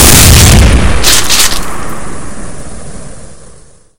This is a shotgun sound I made for my Doom 3 mod "Xtra", it is a juicy high quality sound specially made for games, it includes the fire and cock sounds of the weapon.
this sound was recorded using a HDR-PJ540, then edited using Audacity
you can download said mod here